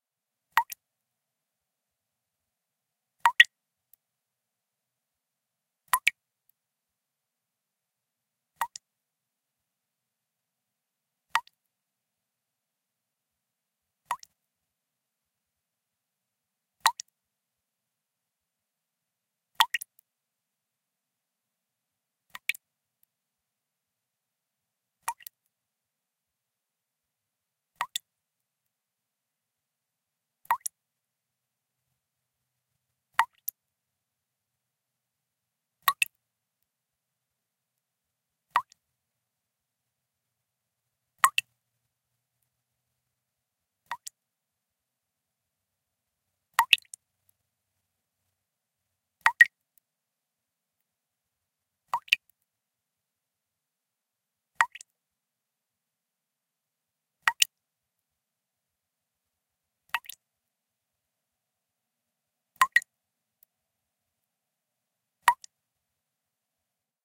Drops of water falling at a relatively slow rate into a 2-cup glass measuring container with some water accumulated in it. Some background noise remains but has been reduced to a low level -- just add your own filtering and ambience or reverb. Seamless loop.